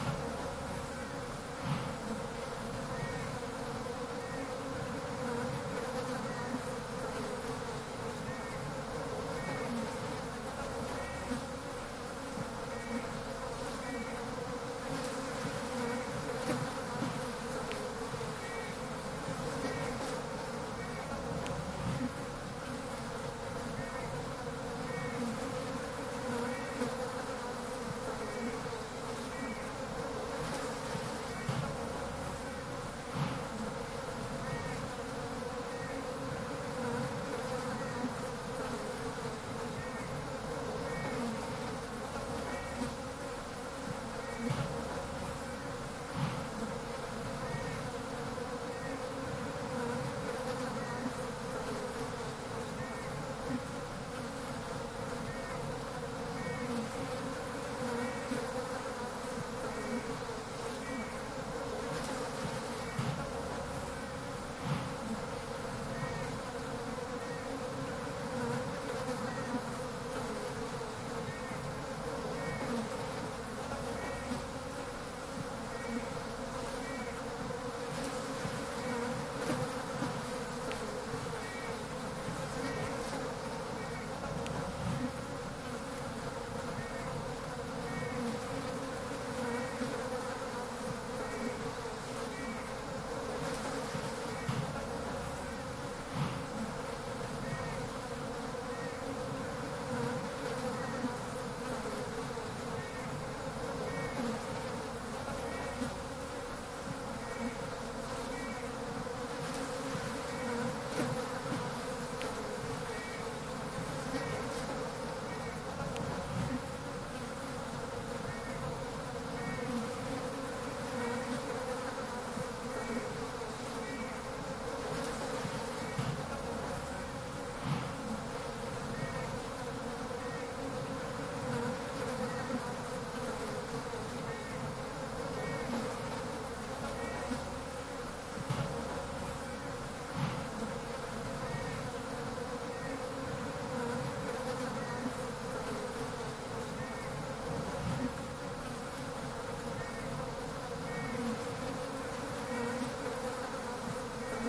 Flies on shit 2
Flies around cow/horse shit at Omalo, Tusheti
Omalo, insects, flies, nature, shit, field-recording, bees, summer